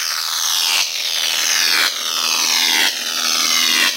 Electric Sound effect